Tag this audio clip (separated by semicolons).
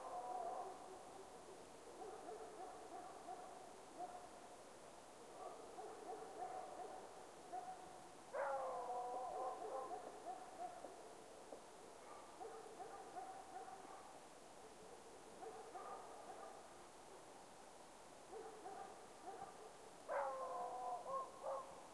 atmosphere; dog; forest